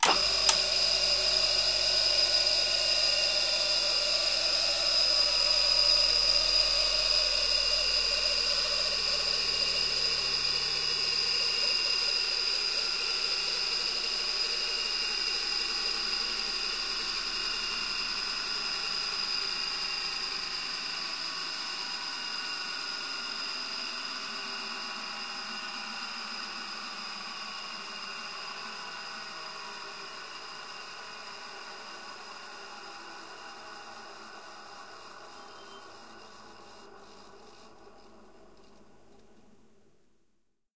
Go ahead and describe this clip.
Bench grinder start and stop 01
Electrical bench grinder being started and stopped immediately. Raw sound without further processing. Recorded with Tascam recorder DR-22WL + tripod.
In case you use any of my sounds, I will be happy to be informed, although it is not necessary. Recording on request of similar sounds with different technical attitude, procedure or format is possible.
bench, button, construction, factory, grinder, industrial, machine, machinery, mechanical, metal, noise, scrape, steel, tools, whir, work